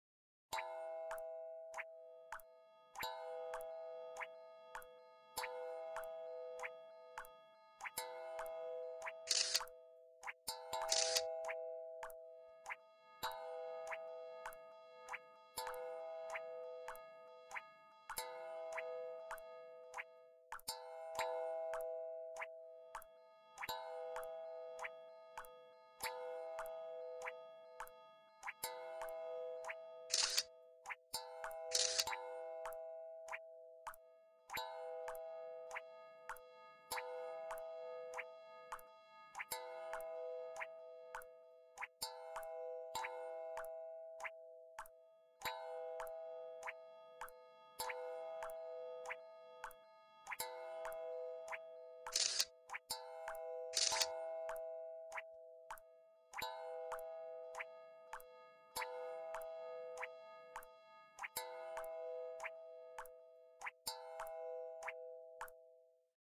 METALLO (sfondo composizione)
This is a sound I've personally composed using some samples taken "here and there" over this site's pages.
Unfortunatelly I'm not able to mention the authors of those sounds. The one I've created did mean to be something pretty rithmycal over which I could play some bar-lines. That's why there are basicly two different sounding water drops orchestrated in my will as octaves. And then over and around those raindrops you can hear other few sounds made by iron or steel objects that gives a very little movement to the whole composition. It's only the beginning of this experiment, I'm planning to improve it as soon as I find othe interesting sounds I can use as sort of chart's parts. It's maybe useless as general, but anyone is very wellcome to express his/her own evaluation about it.